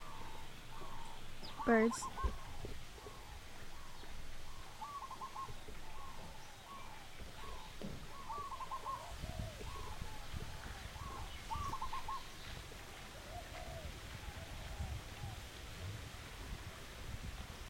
Birds with Stream
Recording of birds with a nearby stream